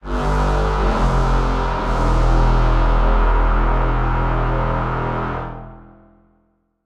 Game Over Sound
A sound that can be used for a game over.
game, gameover, sfx, soundeffect